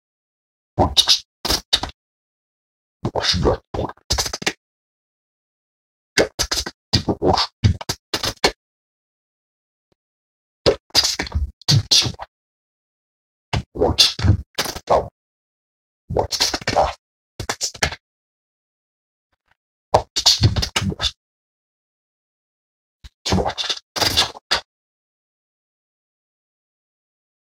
3 of 3 insectoid talking sounds I did on my phone after watching a half decent sci-fi anime with insectoids in it but didn't have a good enough talking sequence imho. •√π¶∆°¥